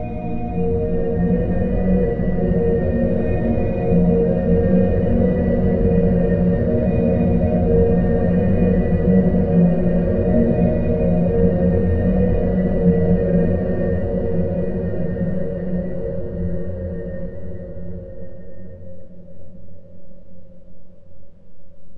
UNEASY MUSIC
DISTORTED, SUSPENSE, TERROR, SOUNDTRACK, UNEASY, HORROR, EVIL